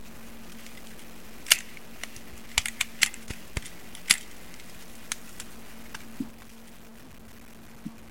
gun reload-A
A gun-reloading sound.
gun, cock, reload